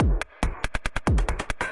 acidized beats with fx